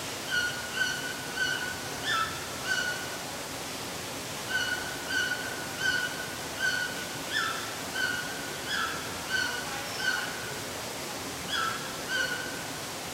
This is a Red-billed Toucan calling from a free-flight aviary indoors. Recorded with a Zoom H2.
waterfall, aviary, toucan, tropical, bird, zoo, indoors, rainforest, exotic